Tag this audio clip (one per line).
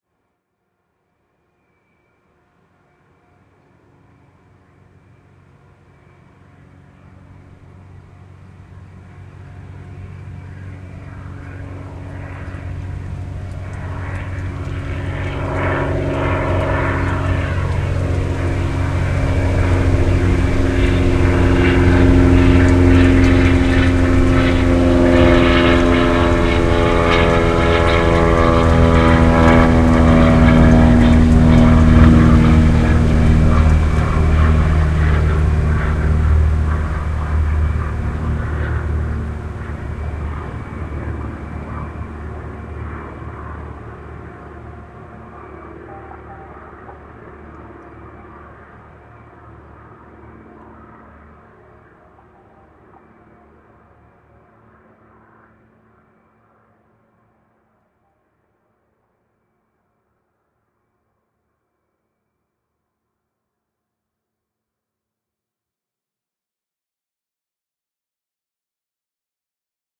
jet
stereo-field
propeller
airplane
engine
prop
field-recording
aircraft
fly-by
plane